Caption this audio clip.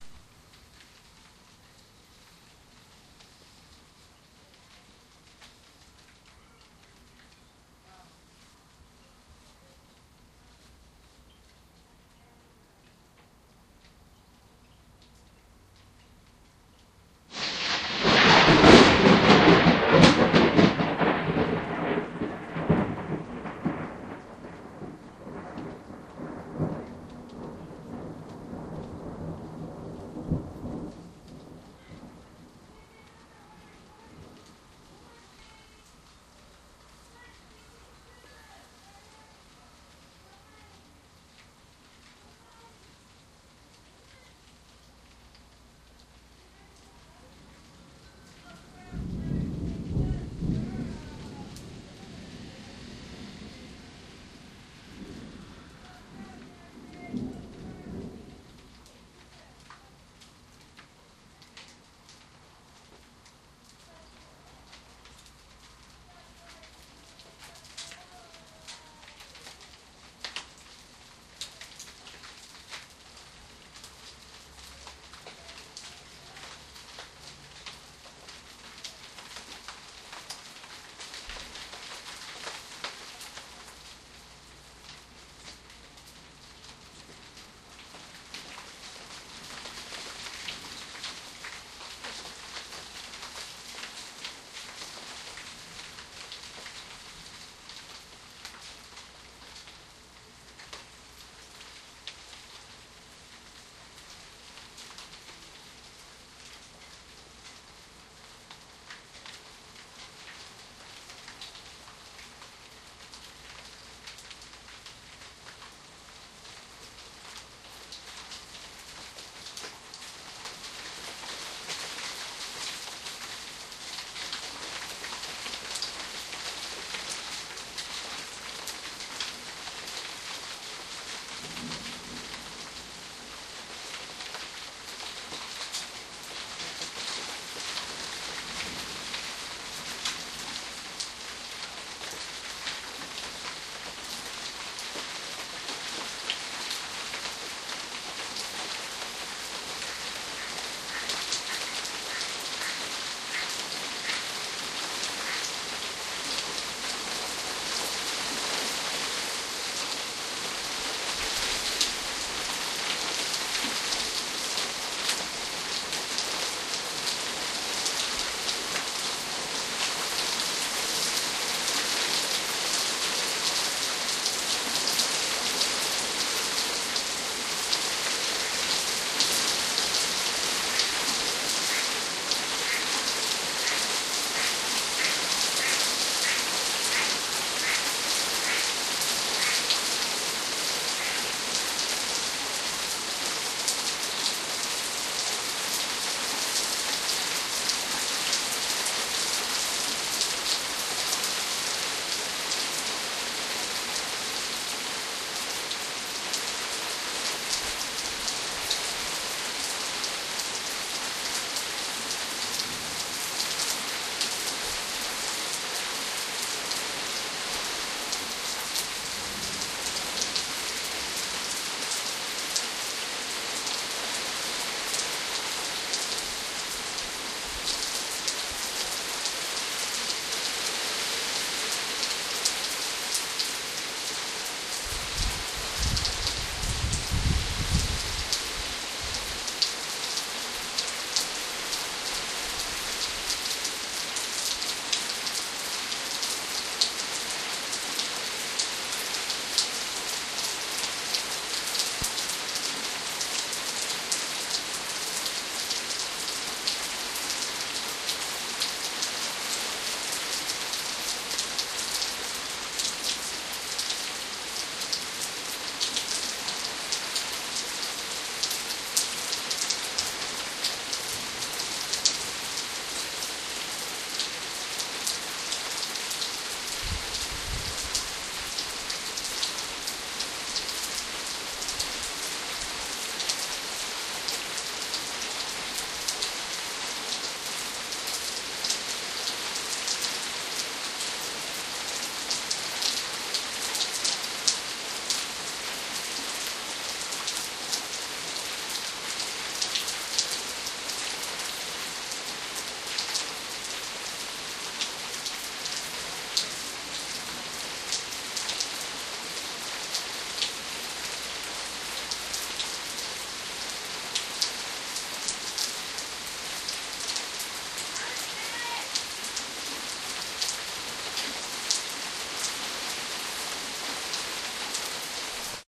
memorial crash rainbuildup
Memorial Day weekend rain and thunderstorm recordings made with DS-40 and edited in Wavosaur. An impressive thunder clap gets this gradual build up rain going while neighbors scramble to get inside.